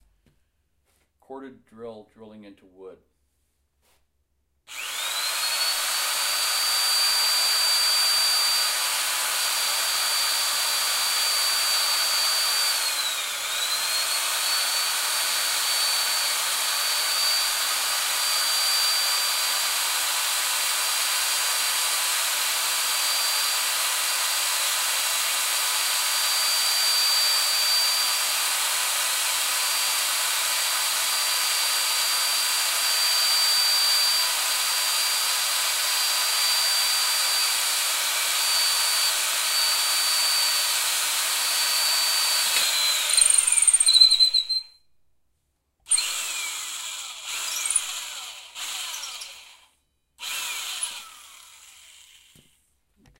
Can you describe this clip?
Corded drill drilling into wood
corded, drill, drilling, garage, into, power, tool, tools, wood